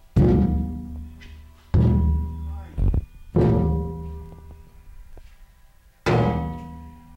I recorded this sound back in 2002. Hitting something metal.
bang, bass, thump, hit